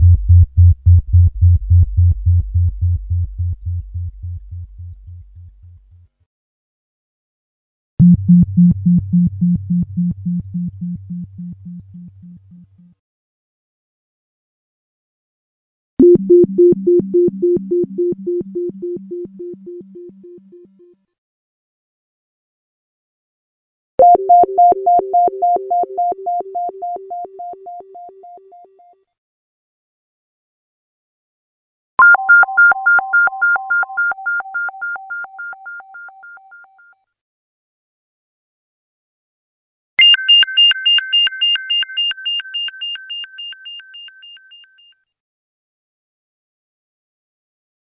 EVOLUTION EVS-1 PATCH 097
Preset sound from the Evolution EVS-1 synthesizer, a peculiar and rather unique instrument which employed both FM and subtractive synthesis. This pulsed sound is a multisample at different octaves.
evolution, evs-1, gated, patch, preset, pulse, pulsed, synth, synthesizer